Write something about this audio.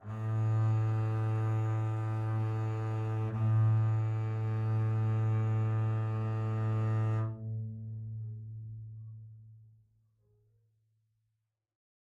One-shot from Versilian Studios Chamber Orchestra 2: Community Edition sampling project.
Instrument family: Strings
Instrument: Solo Contrabass
Articulation: vibrato sustain
Note: A2
Midi note: 45
Midi velocity (center): 63
Microphone: 2x Rode NT1-A spaced pair, 1 AKG D112 close
Performer: Brittany Karlson